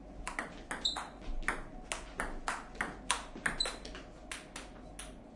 Office staff play ping-pong. Office Table Tennis Championships sounds.
game, office, ping-pong, play, sport, staff